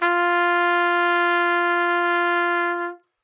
Part of the Good-sounds dataset of monophonic instrumental sounds.